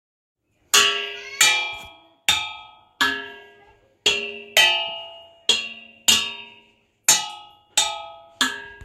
Hitting saucepans of different sizes with a wooden spoon. Raw audio, no edits. May be background noise.